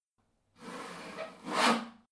A one-shot of a saw going in and out of wood.
DIY, saw, One-shot